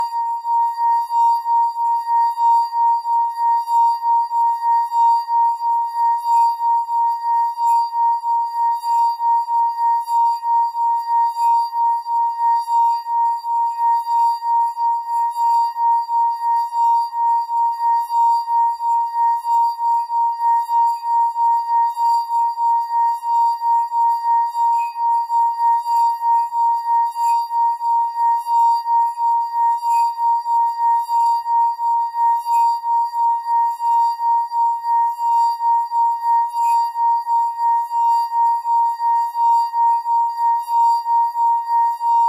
Wine Glass Sustained Note Bb5
Wine glass, tuned with water, rubbed with wet finger in a circular motion to produce sustained tone. Recorded with Olympus LS-10 (no zoom) in a small reverberating bathroom, edited in Audacity to make a seamless loop. The whole pack intended to be used as a virtual instrument.
Note Bb5 (Root note C5, 440Hz).
drone, clean, glass, melodic, loop, tone, tuned, note, instrument, sustained, wine-glass, water